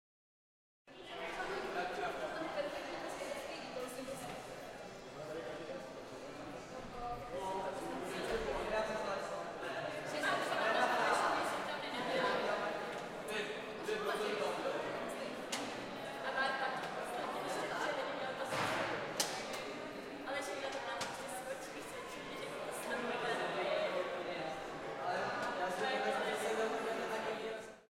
Ambient of school corridor
cz, czech, panska, school